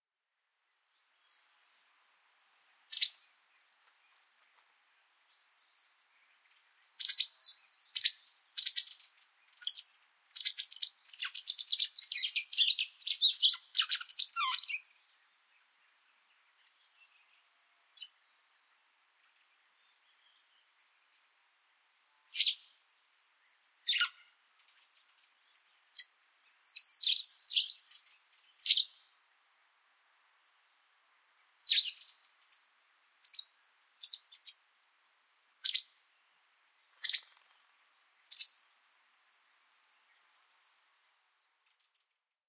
A stereo field-recording of a Pied Wagtail (Motacilla alba).Edited for traffic noise. Rode NT-4 > FEL battery pre-amp > Zoom H2 line in.
bird, field-recording, motacilla-alba, pied-wagtail, stereo, xy